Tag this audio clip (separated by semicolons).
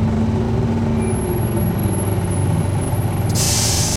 engine; mono; machines; transportation; travel; industry; bus